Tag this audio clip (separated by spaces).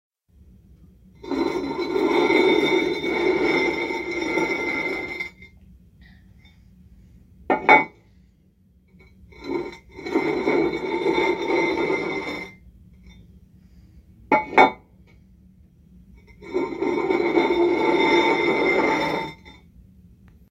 scrape toilet